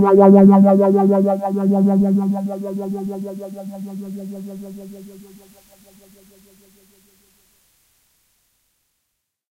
soft rubber
twang-like sound emulating a ruler movement